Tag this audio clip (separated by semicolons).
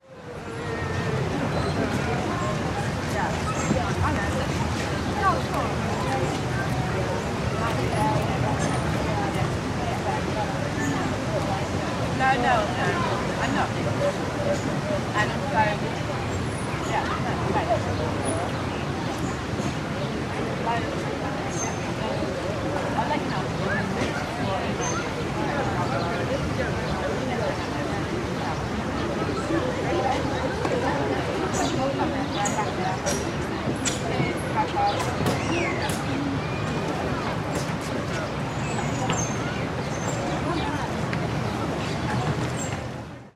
walla; exterior; urban; ambience; ciutadella-park; barcelona; mono